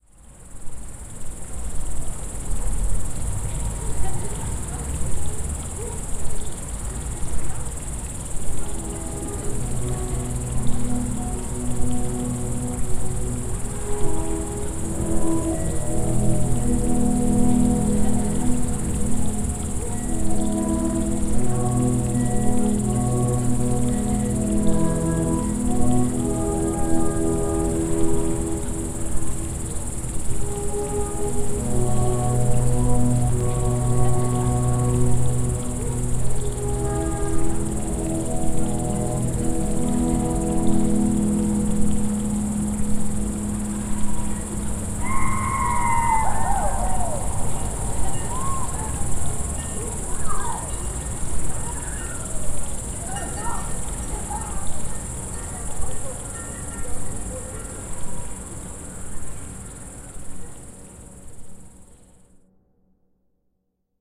Swiss balcony at dusk, edge of town, Saturday night, sounds of fountain, people chilling, insects, alphorns and that Swiss yodel shout/exclamation thing they do when they get excited :) recorded on a Zoom H4
alphorn, cow, fountain, insects, jauchzen, shout, yodel